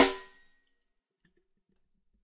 Ludwig Snare Drum Rim Shot
Drum, Ludwig, Rim, Shot, Snare